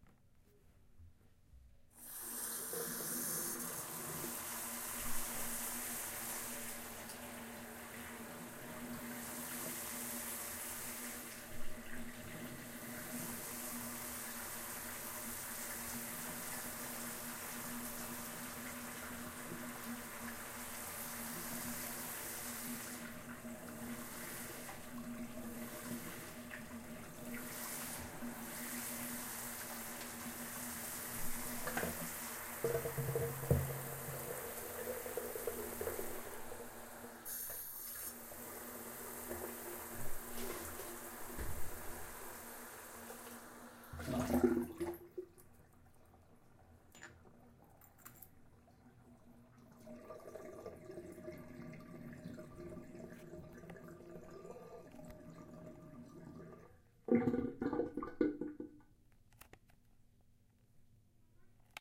We will use this sounds to create a sound postcard.